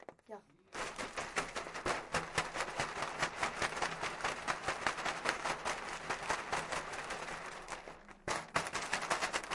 We will use this sounds to create a sound postcard.
doctor-puigvert, sonicsnaps, spain, barcelona, sonsdebarcelona